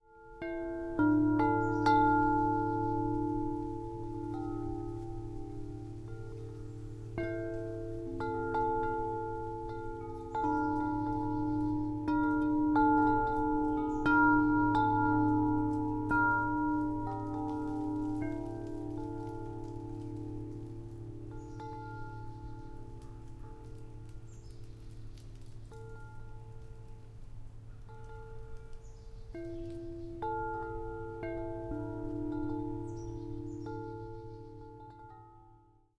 wind chimes birds squirrel
I recorded this at sunrise in a breeze with a digital recorder close the tubular bells of a large wind chime, about 4 to 5 feet, you can hear birds in the background, a squirrel was close by cracking nuts, minimal background noise. Request a loop if you want to use this for meditation.
bells, relaxing, meditation, windchimes, relaxation